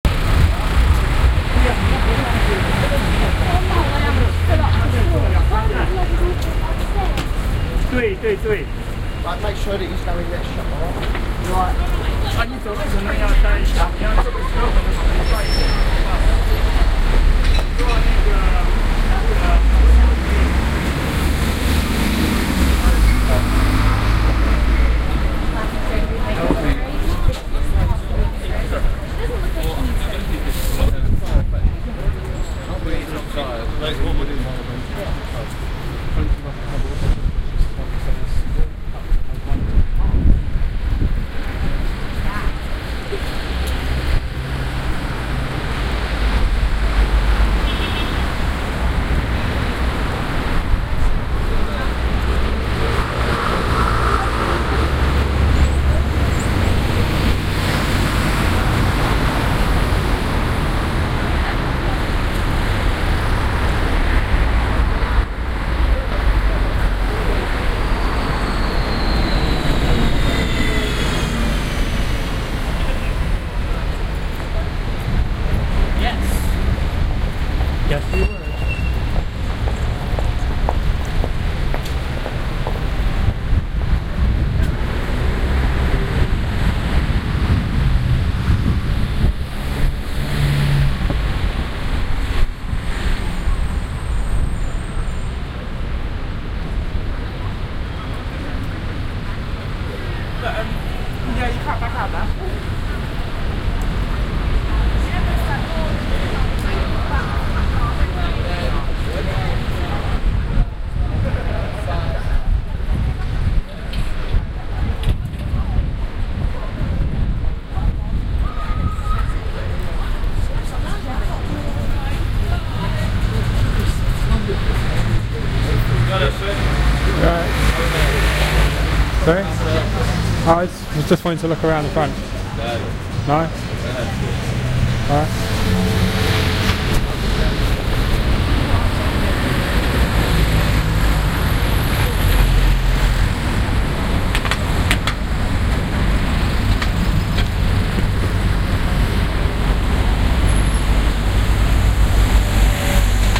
Goodge St - Tottenham court road ambience:Horrible man in spearmint rhino
Goodge St - Tottenham court road ambience: Horrible man in spearmint rhino
background-sound, ambient, london, atmosphere, general-noise, ambience, ambiance, soundscape, city, field-recording